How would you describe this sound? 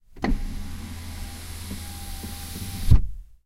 Passat B5 Window UP
VW Passat B5 electric window closing, recorded from inside the car.
automotive, binaural, car, close, electric-motor, electric-window, field-recording, passat-b5, power-window, sliding, volkswagen, window